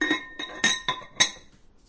Zavírání dózy s jídlem.